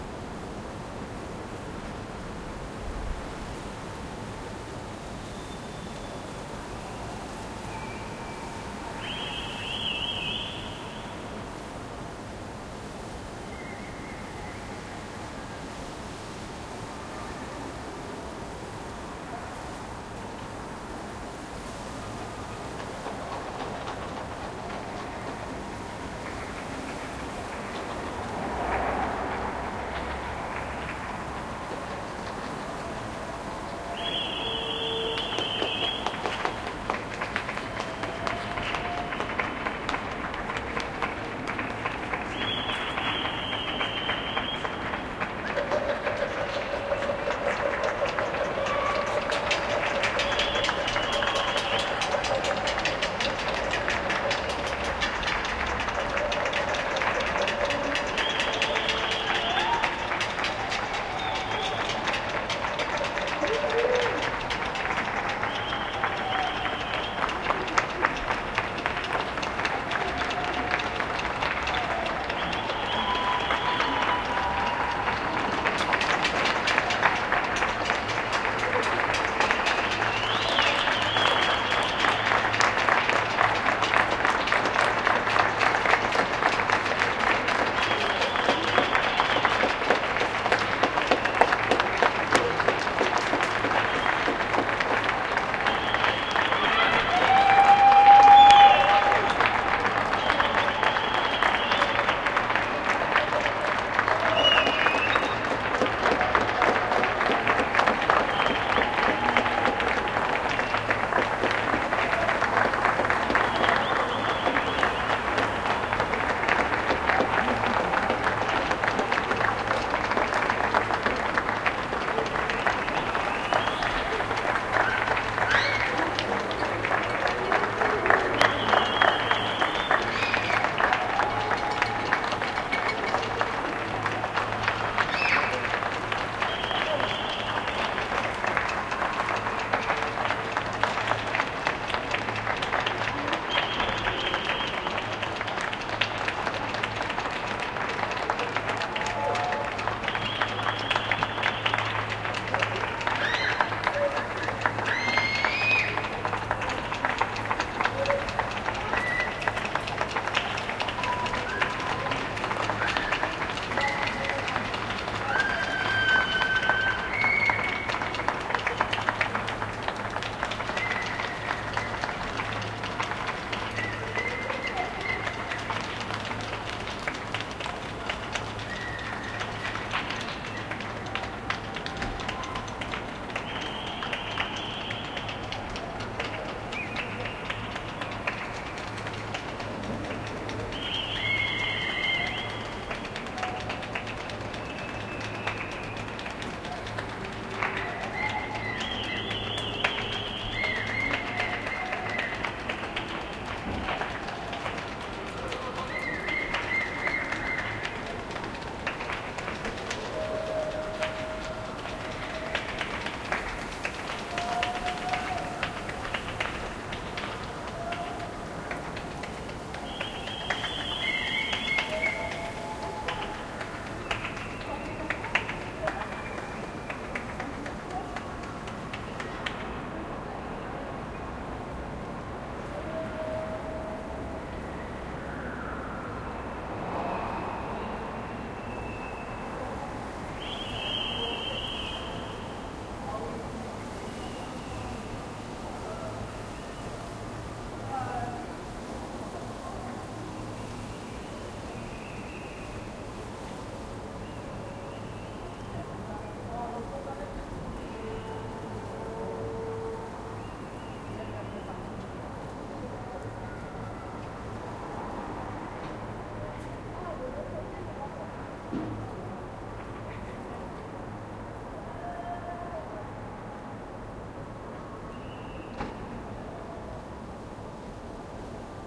Ambiance - Applausses, Cheers, thanks to the French nursing staff, Grenoble - 2020.03.22
Ambiance, Applausses, Cheers, thanks to the French nursing staff, Grenoble, France